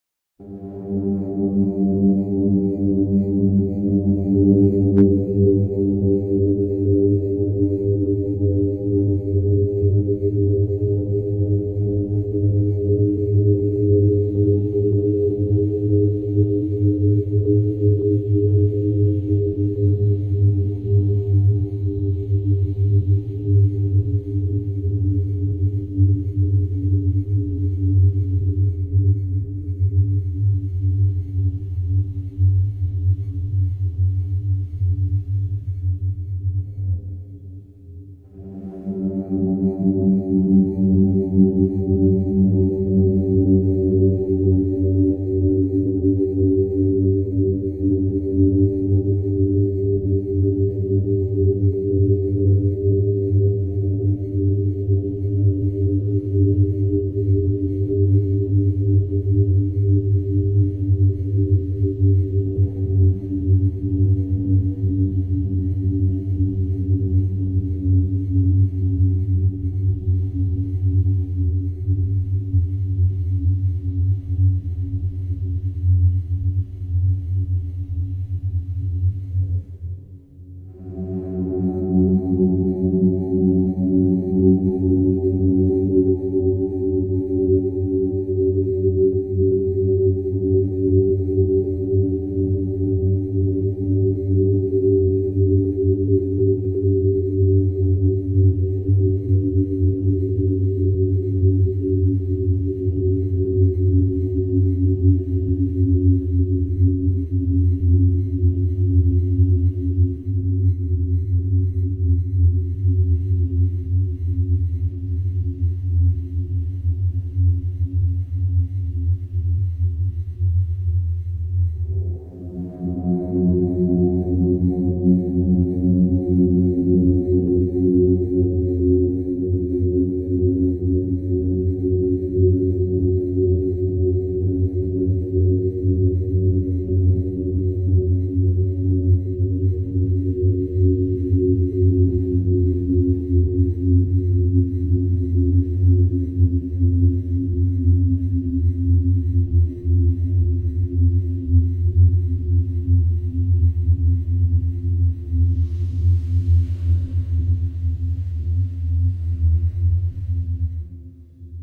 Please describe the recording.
horror wail
A spooky "wailing" sound, that puts your senses on-edge. Simply made with my electric guitar and me messing around with effects in audacity. Paul stretch is a superb effect for creating a stretched out "wailing" sound.